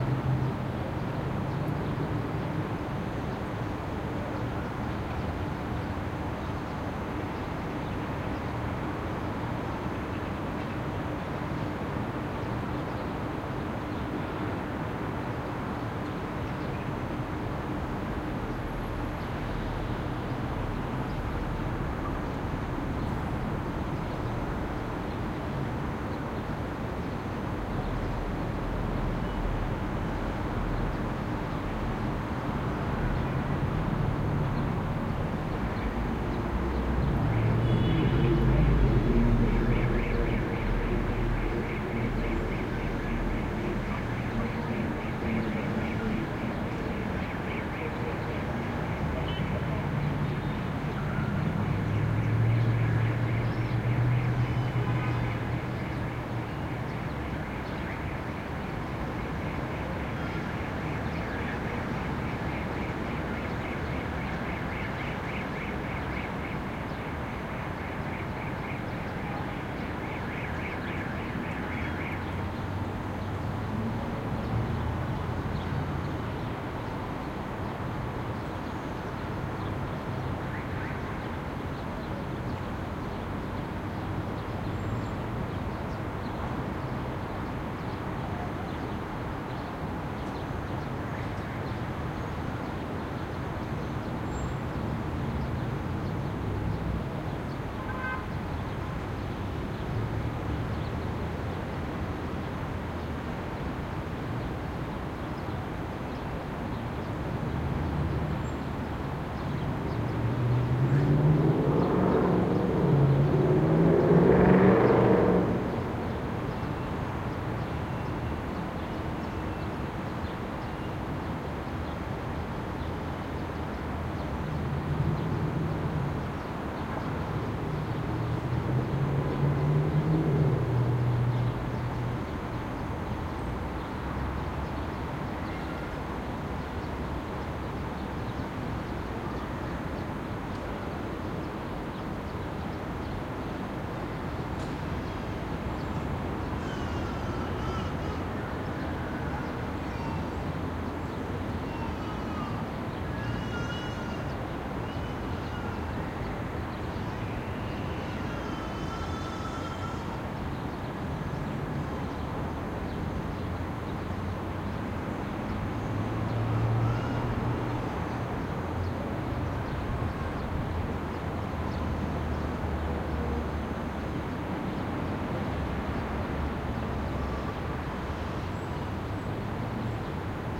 This is a real reording sound file from the big city. Please write in the comments where you used this sound. Thanks!